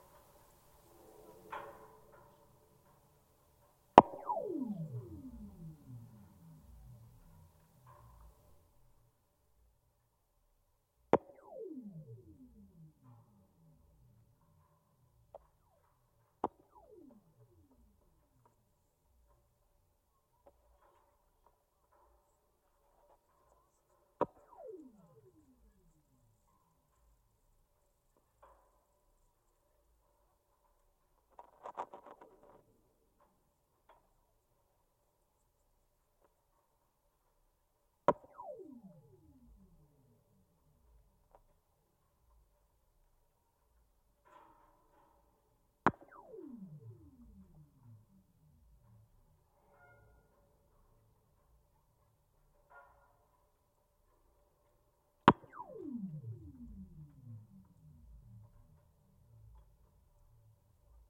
Sundial Bridge 03 cable 13
Contact mic recording of Santiago Calatrava’s Sundial Bridge in Redding, California, USA. Recorded June 24, 2012 using a Sony PCM-D50 recorder with Schertler DYN-E-SET wired mic attached to the cable with putty. This is one of the longer cables (13) being struck repeatedly, yielding a Doppler pulse.
bridge, cable, Calatrava, contact, contact-mic, contact-microphone, DYN-E-SET, field-recording, metal, mic, PCM-D50, Redding, Schertler, Sony, steel, struck, Sundial-Bridge, wikiGong